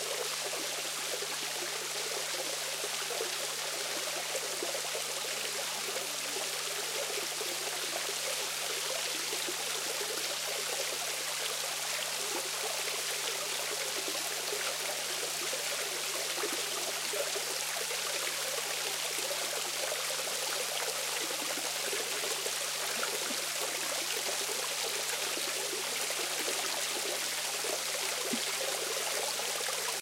A small waterfall in southern Illinois, USA. Recorded with a mini-DV camcorder with an external Sennheiser MKE 300 directional electret condenser mic.
continuous, water